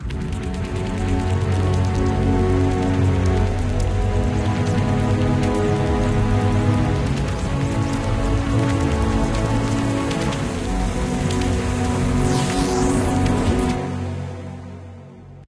Epic intro music for your logo
Cinematic, Epic, Epic-Music, ident, Intro, logo, logo-music, Movie, Orchestra, Orchestral, Outro, Sountrack, thriller, Uplifting